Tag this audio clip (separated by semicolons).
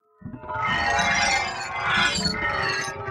glass; processed; sound-design